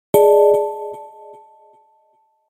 Generic unspecific arftificial sound effect that can be used in games to indicate an alert or an important message